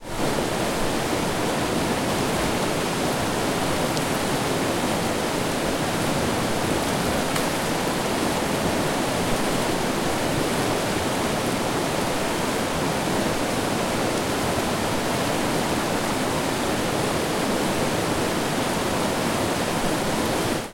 River Rapid Vinstrommen 2
Recording of Vinstrommen in the river Voxnan in Sweden with very high water level.
Equipment used: Zoom H4, internal mice.
Date: 15/08/2015
Location: Vinstrommen, Voxnan, Sweden
Rapid, River, Stream, Water, White-Water